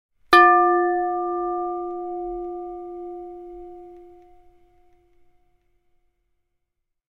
Large Pottery Bowl
Part of a pack of assorted world percussion sounds, for use in sampling or perhaps sound design punctuations for an animation
world, hits, percussion